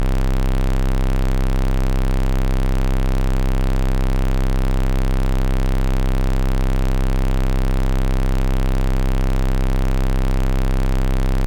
Doepfer A-110-1 VCO Rectangle - C1
Sample of the Doepfer A-110-1 rectangle output.
Pulse width is set to around 50%, so it should roughly be a square wave.
Captured using a RME Babyface and Cubase.
A-110-1, raw